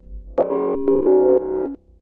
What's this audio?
A small tempo synced sample with harmonic noises, and a gentle infra bass sound.
harmonic, infra, synth, bass